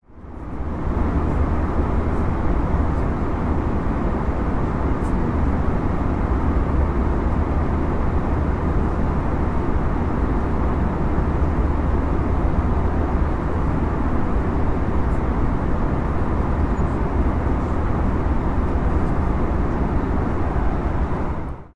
Recorded on a flight to Iceland in 2018.
iPhone SE
Software ADOBE Audition CS6